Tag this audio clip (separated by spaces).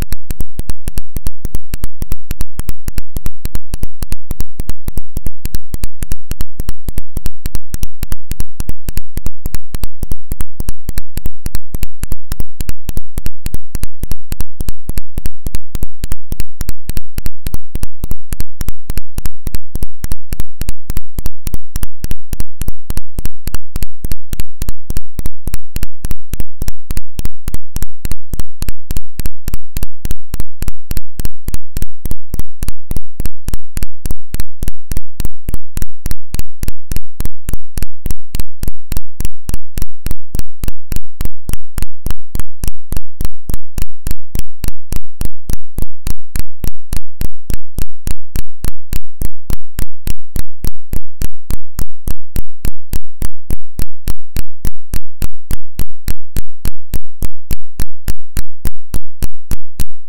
abstract click dance digital effect electric electronic freaky future fx glitch ground-loop lo-fi loop noise sci-fi sfx sound soundeffect strange weird